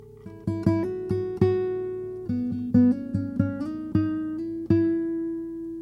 Acoustic Guitar (5)
Few sounds and riffs recorded by me on Acoustic guitar
acoustic, guitar